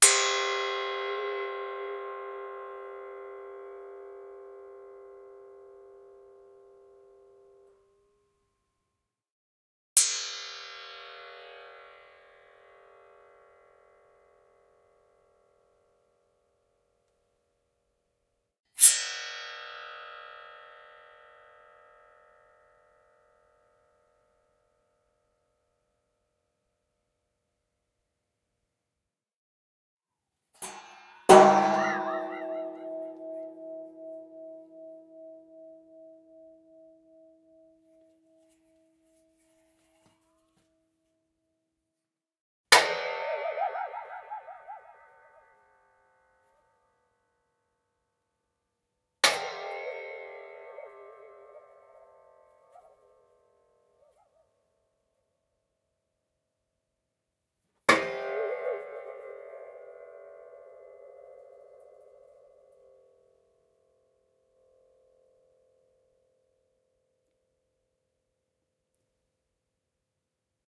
Two Man Saw - Single Hits 3
1.5 meter long crosscut two-man saw with wooden handles being hit at different strength levels, various hit tail alterations and manipulations as the body of the saw is being bent or shaken. Occasional disturbance in the left channel due to unexpected recording equipment issues.